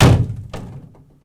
Plastic, jerrycan, percussions, hit, kick, home made, cottage, cellar, wood shed
cottage, made, wood, kick, Plastic, hit, percussions, home, cellar, jerrycan, shed